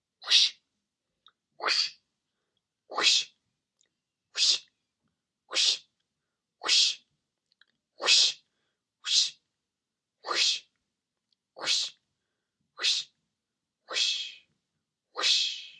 Several Vocal Swishes
A series of swishes made with my mouth.
comedy, swish